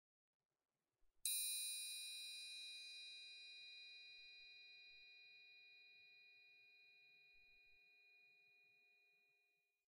medium ring of a triangle